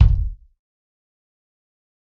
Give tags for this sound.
dirty
drum
pack
punk
raw
realistic
tony
tonys

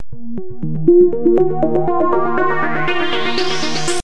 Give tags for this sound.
lead
electronic

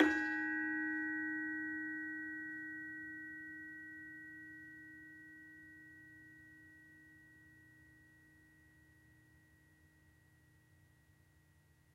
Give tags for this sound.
Casa-da-m
Digit
Digitopia
Gamel
Gamelan
Java
o
pia
porto
sica

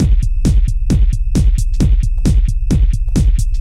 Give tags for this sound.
01
133
bpm

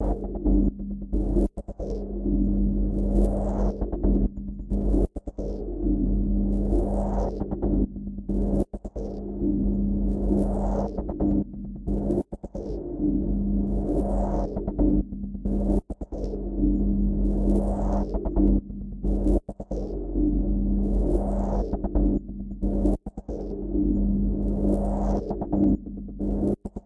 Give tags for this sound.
creative
experimental
loop
loopable
noise
rhythm
rhythmic
washing-machine

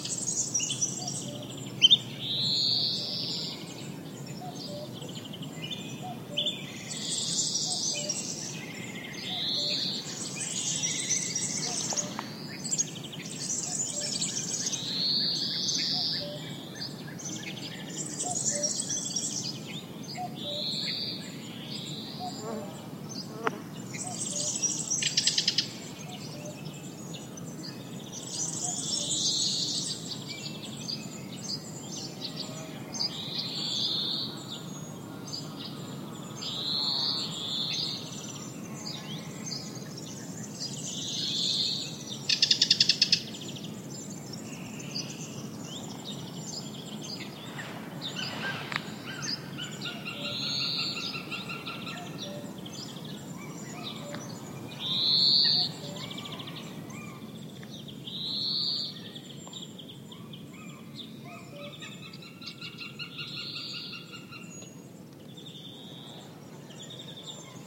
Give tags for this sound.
cuckoo
field-recording
spring
insects
birds
woodland
nature

